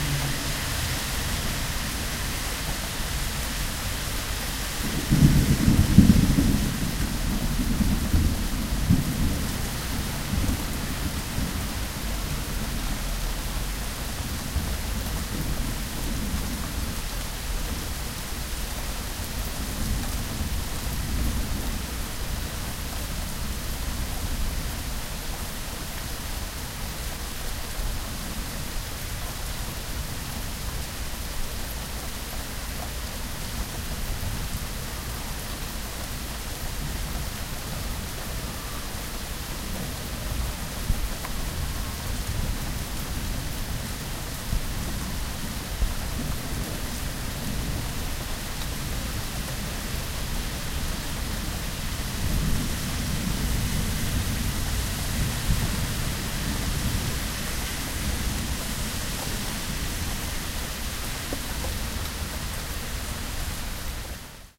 NYC Rain Storm; Some traffic noise in background. Rain on street, plants, exterior home.Close Perspective